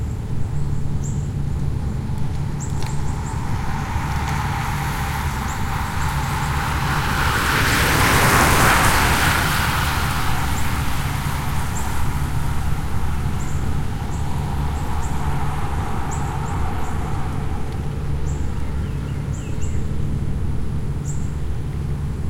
Large group passes then single bike passes, birds and distant machine noise.
Part of a series of recordings made at 'The Driveway' in Austin Texas, an auto racing track. Every Thursday evening the track is taken over by road bikers for the 'Thursday Night Crit'.
bicycle, birds, field-recording, nature